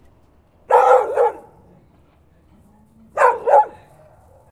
Dog Bark
Short sharp bark, dog close up, outside